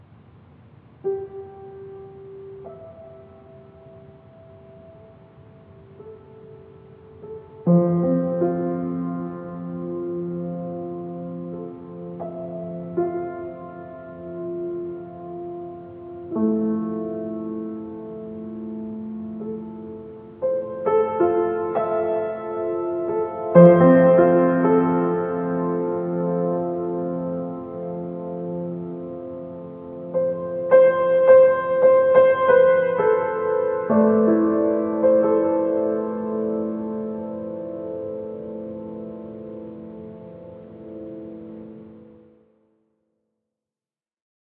lonely-dance-riff4
lonely, complaining, film, dance